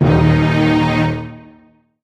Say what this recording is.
Stereotypical drama sounds. THE classic two are Dramatic_1 and Dramatic_2 in this series.
cinema, cinematic, drama